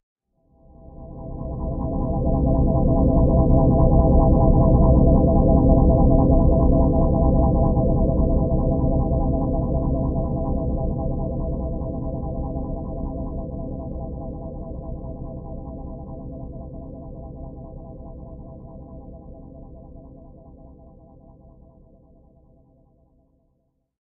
This sample is part of the "PPG MULTISAMPLE 003 Lfoed"
sample pack. It is an experimental sound effect, suitable for
experimental music or as a sound effect. It consists of a texture with
some LFO
and fades on it. In the sample pack there are 16 samples evenly spread
across 5 octaves (C1 till C6). The note in the sample name (C, E or G#)
does not indicate the pitch of the sound but the key on my keyboard.
The sound was created on the PPG VSTi. After that normalising and fades where applied within Cubase SX.